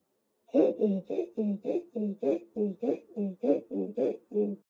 Cat Pant
Sound of a panting cat.
cat, panting, tired